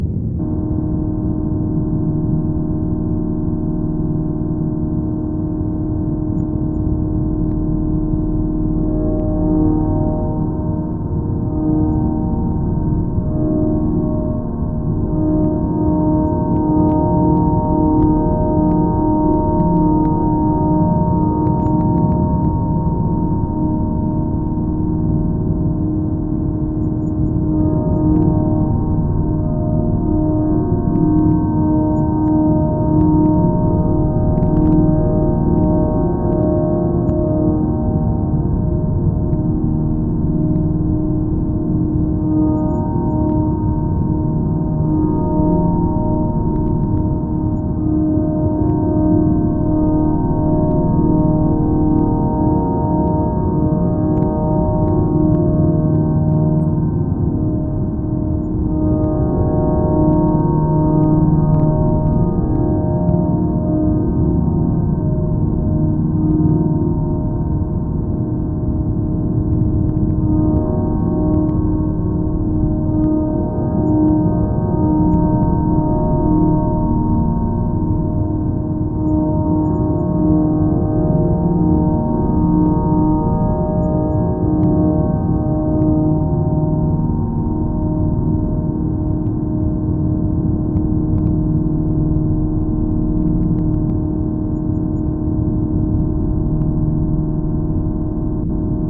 A conversion of my voice into a drone via the Samvada app.
ambient, atmosphere, deep, drone, experimental, sinister